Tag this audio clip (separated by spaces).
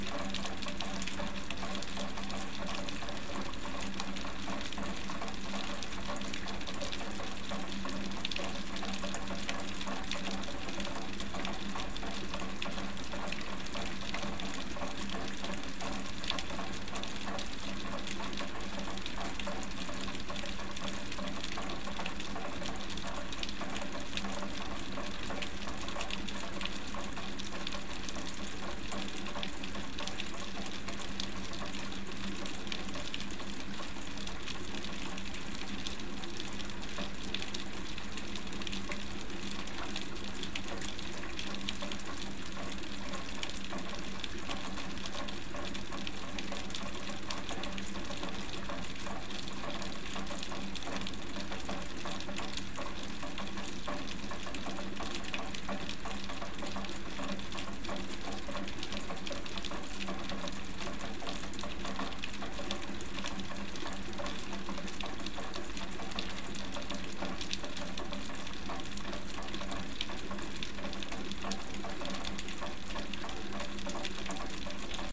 Rain Spring York Nature New Buffalo Drops Storm Weather field-recording